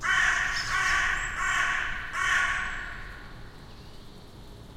A couple of calls from a crow. AT3031 microphones, Shure FP24 preamp, SBM-1 device into TCD-D8 DAT recorder.
birds, craw, craws, crow, field-recording, ringtone